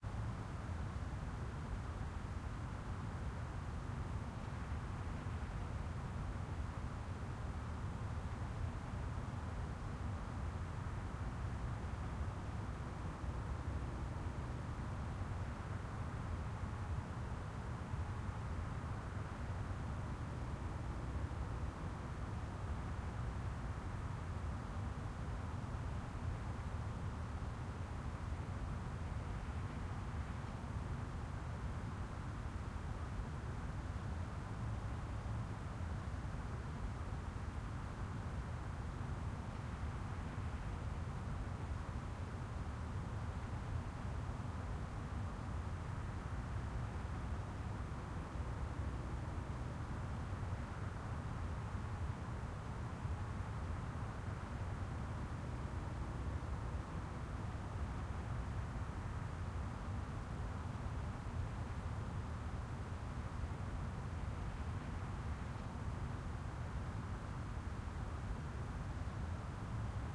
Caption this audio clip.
Air tone in calm residential suburbs
Recorded in the outside.
air, airtone, ambiance, ambience, ambient, atmos, atmosphere, atmospheric, background-sound, calma, ciudad, peace, ruido, suburbio, tone, tono, white-noise